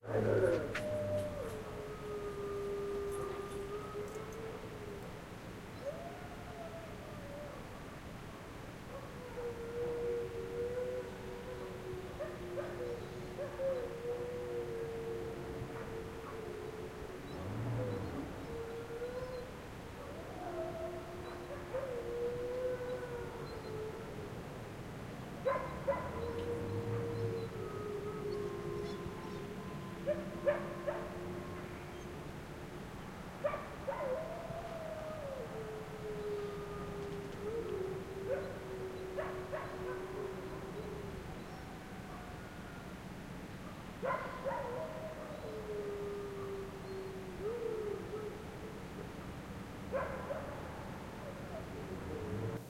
A couple of pet wolves howling at sundown. Sierra foothills California.
Barking, Howls, Wolves